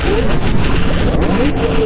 Toxic Soup 2
Ambient noise loops, sequenced with multiple loops and other sounds processed individually, then mixed down and sent to another round of processing. Try them with time stretching and pitch shifting.
processed, loop, industrial, ambient, abstract, noise